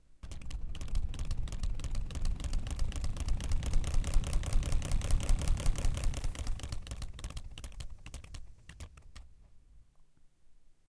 A pinwheel in a wind
pinwheel, wind